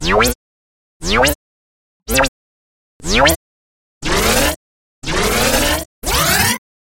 Weird sliding positive user interface sounds.
Generated in SuperTron 2.6 and then time/shift pitched in Audacity.
Plaintext:
HTML: